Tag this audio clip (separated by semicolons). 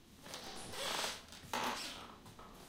loops; stereo; recording; floor; home; squeaks